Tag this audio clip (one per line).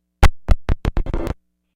needle
vinyl
bounce
skip
drop
record